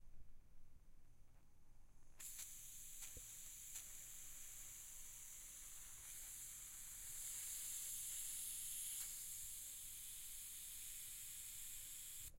160170 GAS OWI
Gas bottle that is open
Open, Gas, Bottle